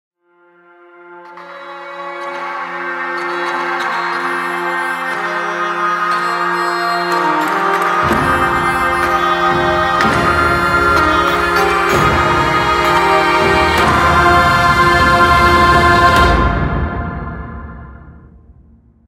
Riser #42 - Medieval / Witcher
Historic, Cinematic, Medieval, Orchestral, Score, Historical, Melody, Choir, Hurdy-Gurdy, Percussion, Soundtrack, Balkan, Witcher, Battle, Balkan-Choir, Music, Trailer, Action, War-Drums, Movie, Game, Epic, Riser, Drums, Fantasy, Instrumental, HurdyGurdy, Film